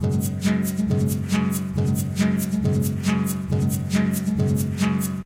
nu groove 2bfd
A nice laid back groove that sits nicely behind ambient sounds. Part of my 120bpm loop pack.